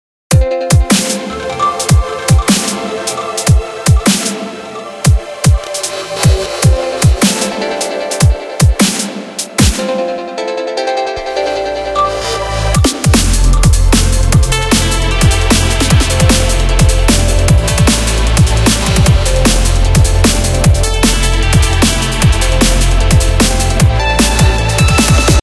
Freelance Loop
Another clip from one of my uncompleted tracks. Like all my loops, it is not even 50% finished and is for anybody to do as they wish.
delay, trance, flanger, free, drums, practise, synths, beat, 2013, mastering, house, fx, sample, electronic, clip, dubstep, comppression, loop, fruity-loops, eq, limters